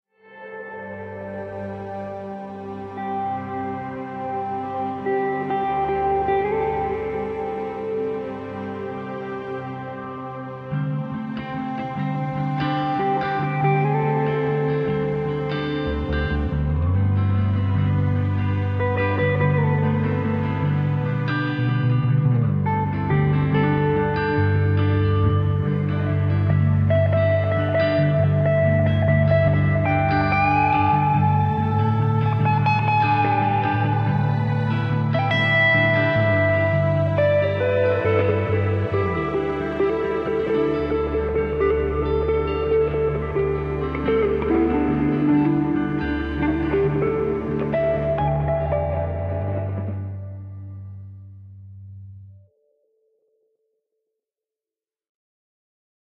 Peaceful Ambiance Music
A short peaceful background ambiance music.
peace, guitar, ambient, bass, calming, music, happy, tranquil, song, calm, background, ambiance, peaceful